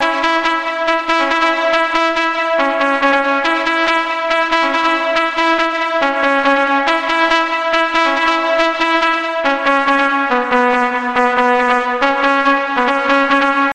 a trumpet loop i made in fruity loops
loop, trance, trumpet